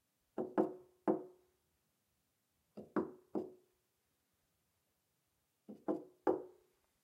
Knocking On Door
knocking on a wooden door.
knock, wood, wooden, knocks, knocking, door